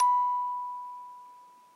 Recorded on an iPad from a musical box played very slowly to get a single note. Then topped and tailed in Audacity.